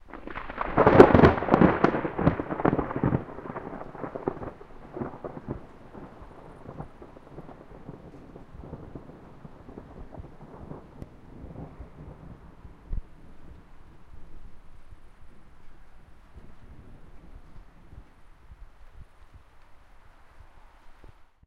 Thunder sound. Recorded with a Zoom H1 and a Furryhead.
lightning, Thunder, weather